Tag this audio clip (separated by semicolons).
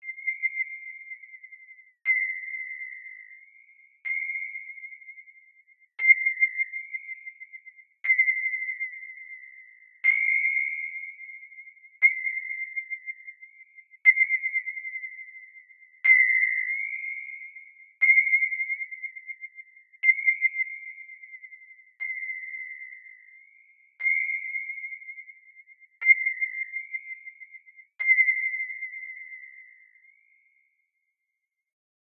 radar; sounddesign; sweet